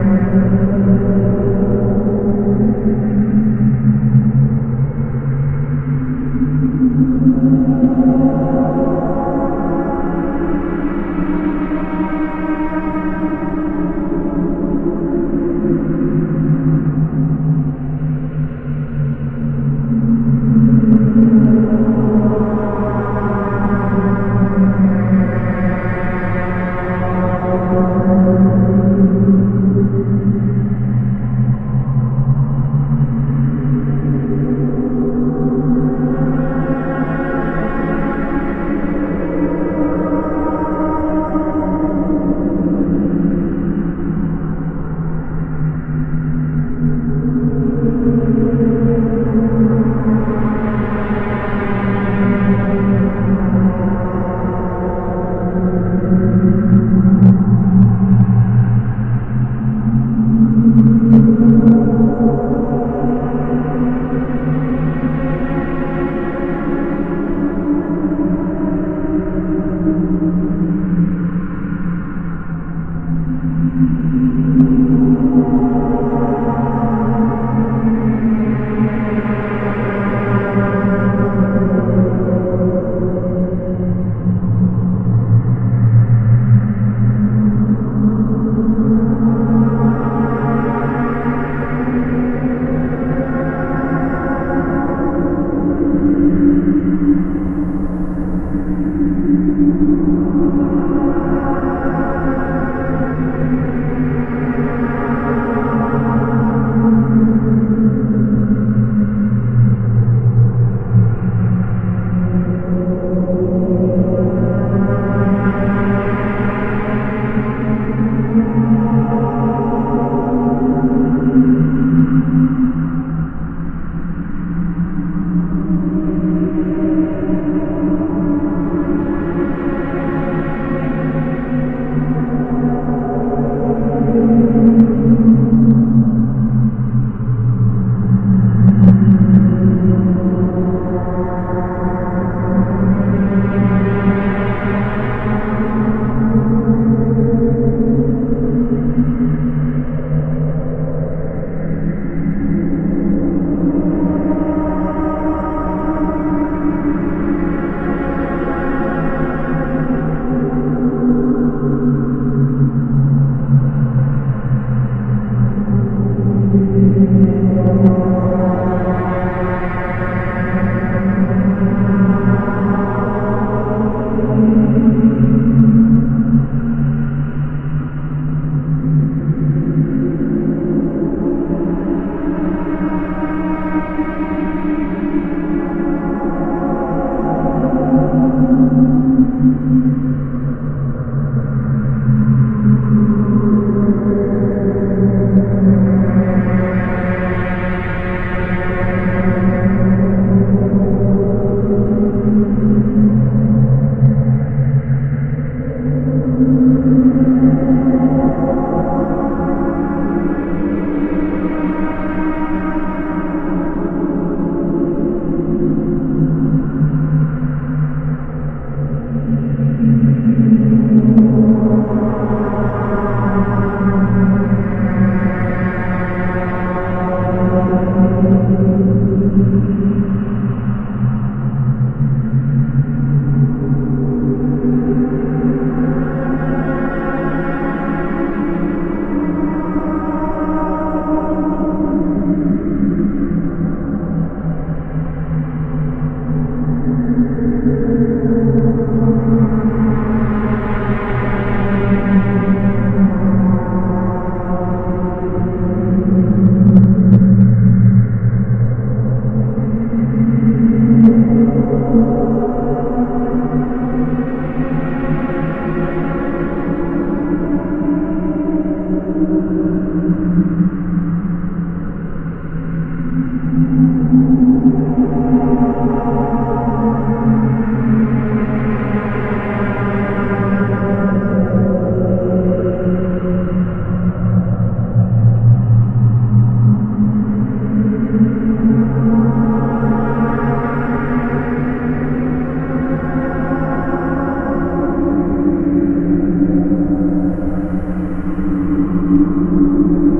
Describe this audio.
haunted space sound created using tones generated and modified in Audacity
creepy, ghost, Gothic, Halloween, haunted, phantom, scary, ship, sinister, space, spectre, spooky, station, terror